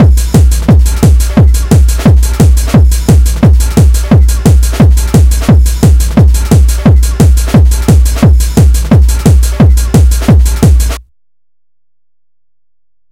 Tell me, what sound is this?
Tekno Loop 1
175 BPM, made with Caustic 3.